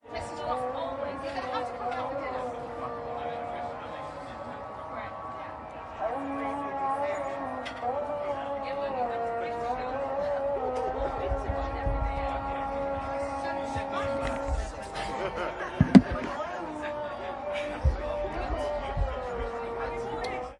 Street Ambience muezzin 2
Recorded in Agadir (Morocco) with a Zoom H1.
Agadir, Arabic, Morocco, parallel, prayer, street, talk